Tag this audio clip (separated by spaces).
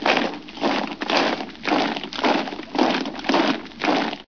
steps march